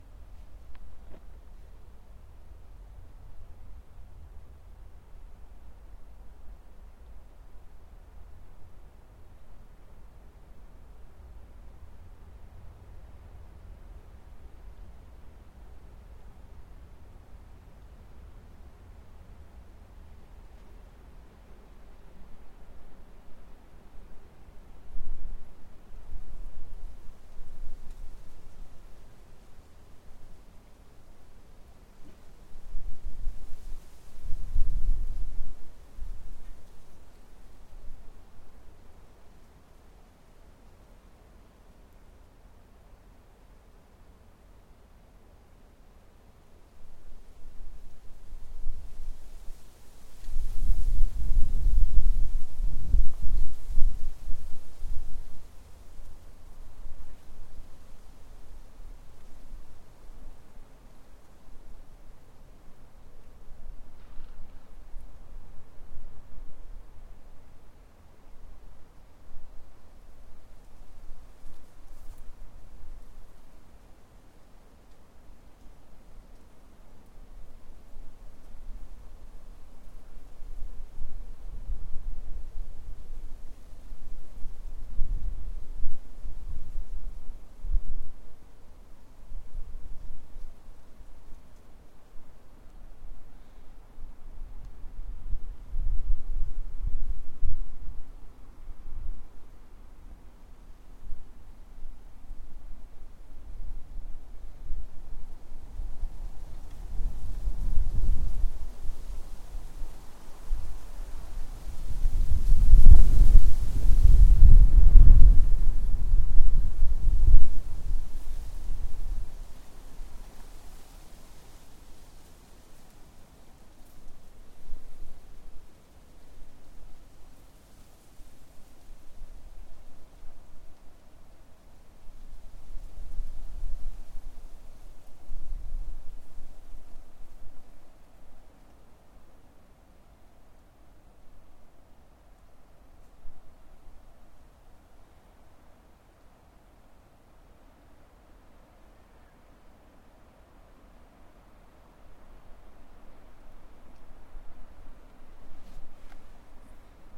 Windy Night 01
Standing at the edge of a forest on a windy night. Some parts have tree leaves rustling and there are a few spots where large gusts of wind blew by.
Earthworks TC25 > Marantz PMD661
forest, breeze, wind, weather, gust, air, leaves, nature, rustling, blowing, blow, atmosphere, trees, windy